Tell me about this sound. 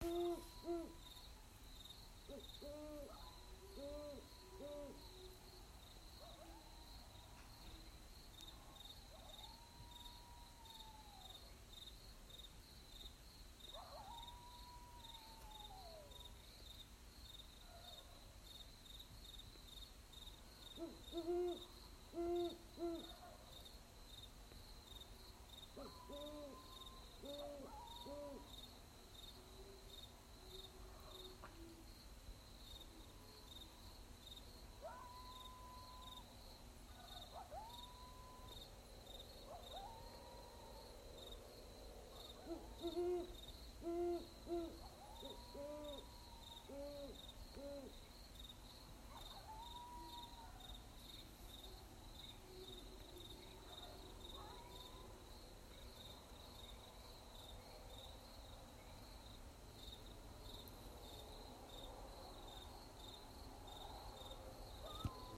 evening sounds with coyote howl and yep mixed with owls and their calls ... beautiful summer evening night sounds